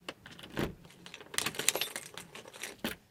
locking door with keys
Locking of a double glazed front door using a key on a keyring with other keys
door, key, keys, lock, rattles